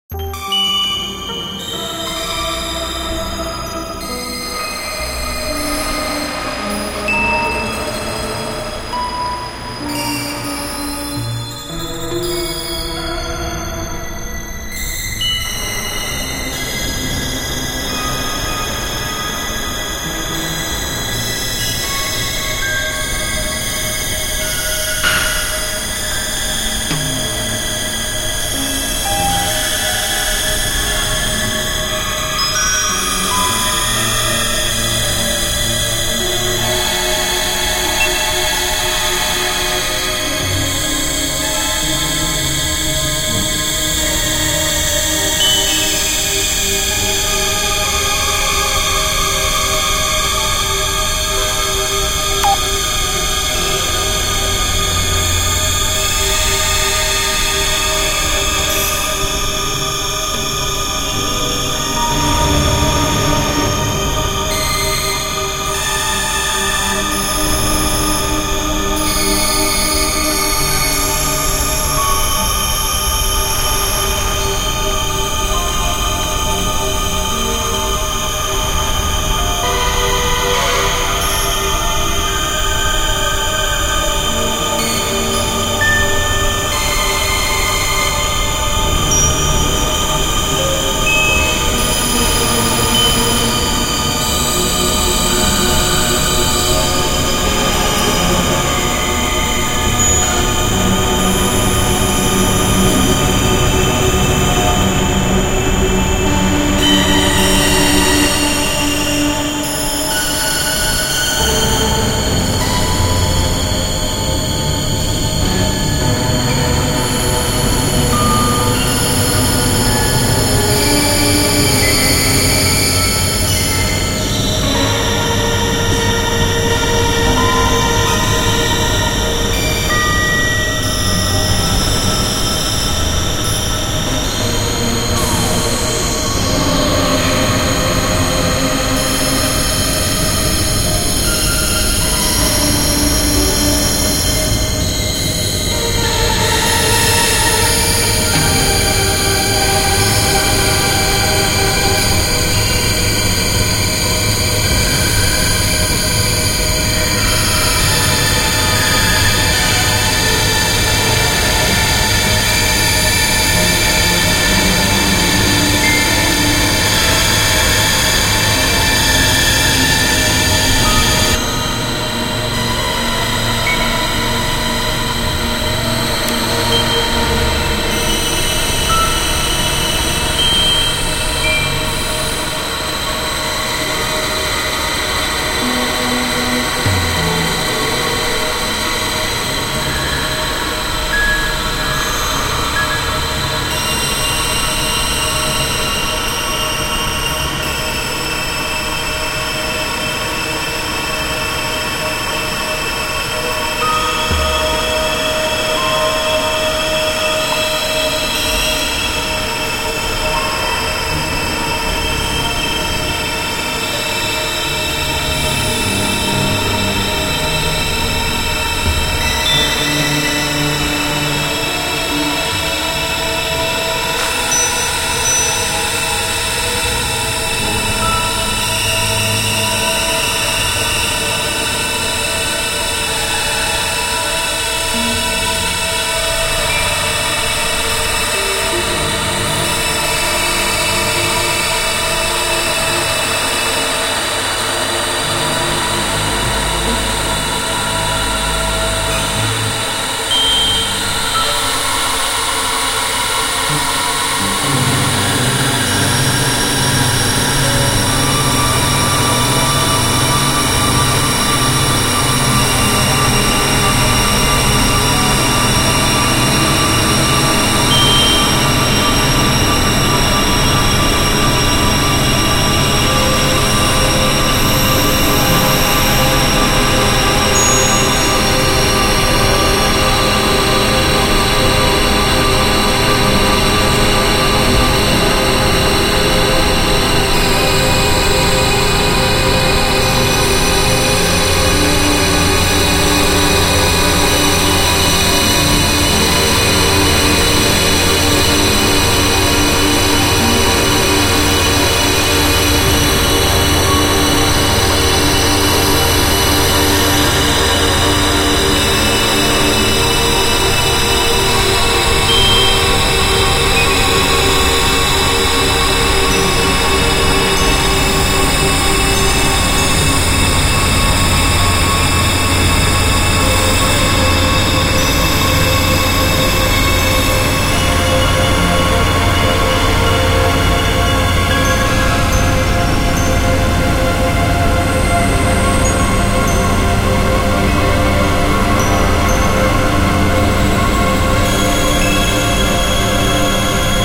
Scary Horror suspense Ambiance
I was trying to make a techno song for my friend that went horribly wrong!!! I converted her voice into midi, then opened the midi into Sony ACID and used ReFX Nexus to try and make a techno song, but something went wrong in SONY Acid during rendering and it took 30 minutes to render, and this scary suspense ambiance was what came out of the failed rendering of the song... this is PERFECT for those scary movies you need to make... I hope you find a use out of my failed song attempt!!!
failed-rendering, horror, suspense